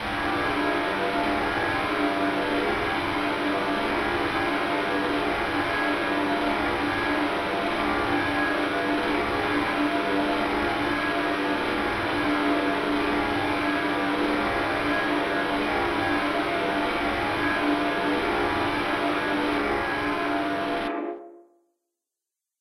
This is a drone created in Ableton Live.
I processed this file:
using Live's built in Ressonator effect (tuned to C#) followed by an SIR (And Impulse Response) effect.
Ableton-Live, artificial, atmosphere, drone, ambient, harsh